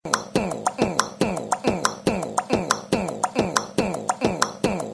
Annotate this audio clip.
Crazy beatbox beat made with the noises of my tongue in the palate and a grumbling. The beat is a little mechanic, but has a something of Brazilian music. Can be used in mixes or as vignette.
Made in a samsung cell phone (S3 mini), using looper app, my voice and body noises.